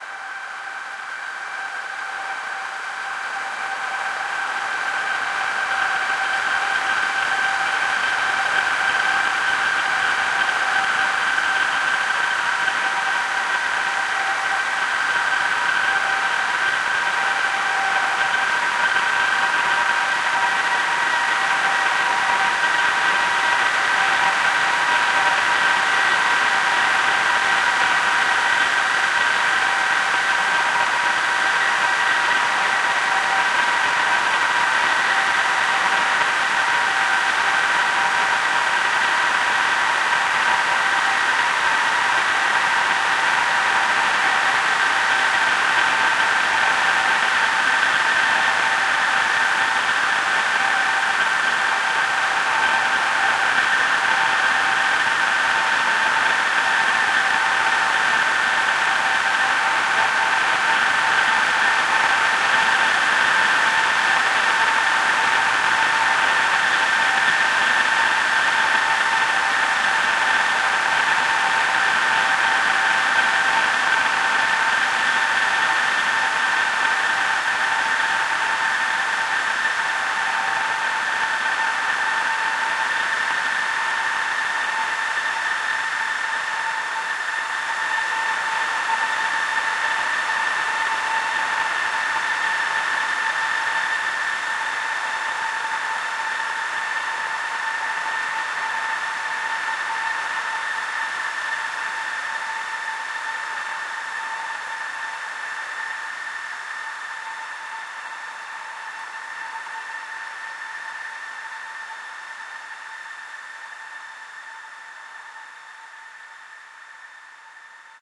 Part of assortment of sounds made with my modular synth and effects.
soundscape, drone, pad, ambient, atmosphere, dark